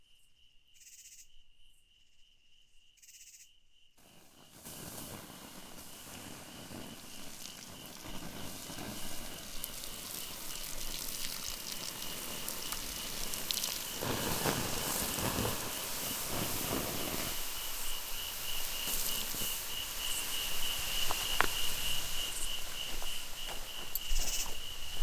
lost maples putting out fire1
texas, insects, hill-country, hiss, forest, smoke, fire